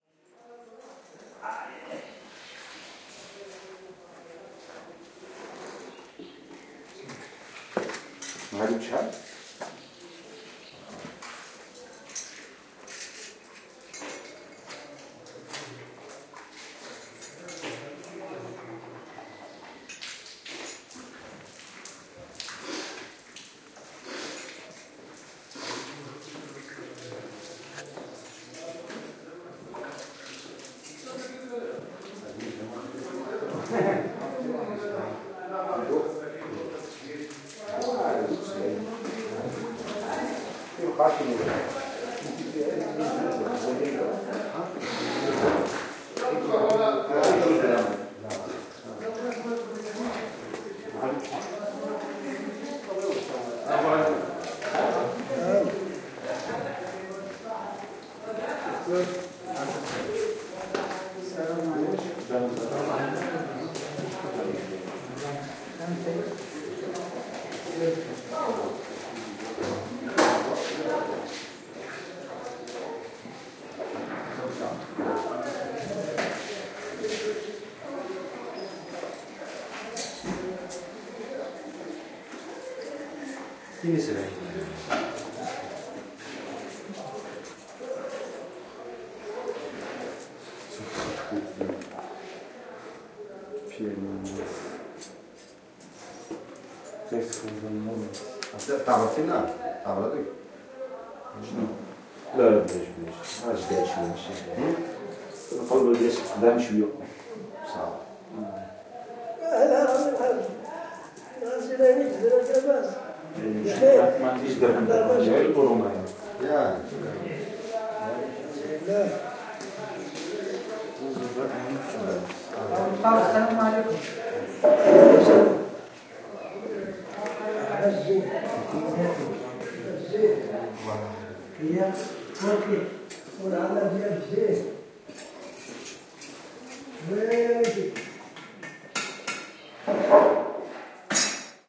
funduk washing cooking and trading medina marrakesh
This recording was made in Medina, Marrakesh in February 2014.
Binaural Microphone recording.
cooking, washing, Marrakesh, Medina, trading, Funduk